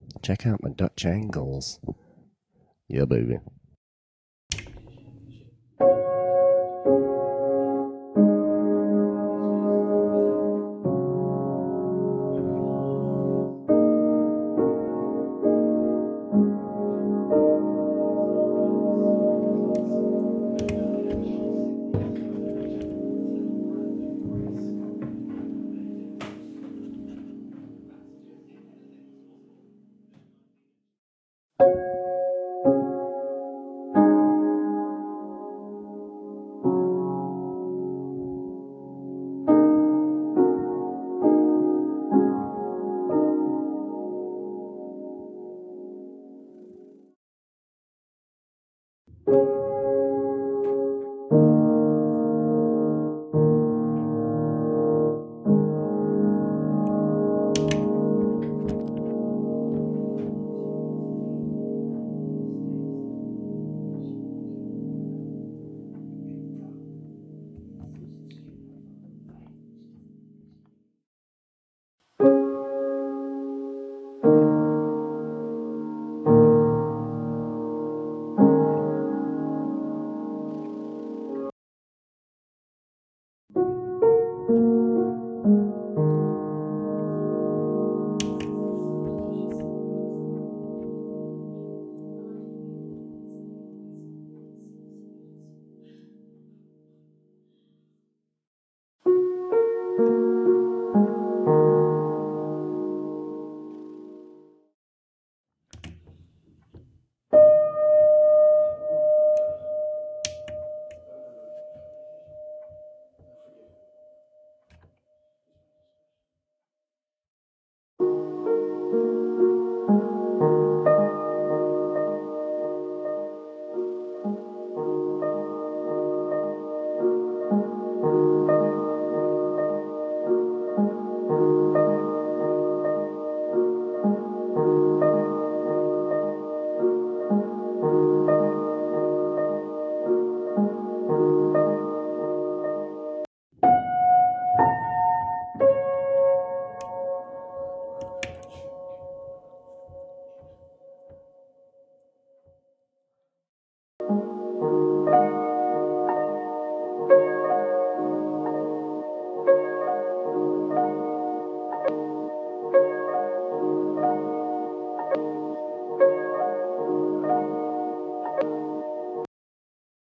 Re-formatted to prevent distortion.
"Formatted for use in the Make Noise Morphagene. A piano which belonged to my great grandmother. This is from 1873 and sounds unbelievably lovely. Recorded with a dynamic mic going into a Doepfer A-119 and recorded by the Morphagene."